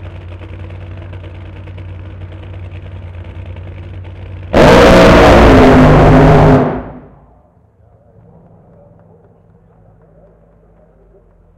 Top Fuel 5 - Santa Pod (B)
Recorded using a Sony PCM-D50 at Santa Pod raceway in the UK.
Engine, Race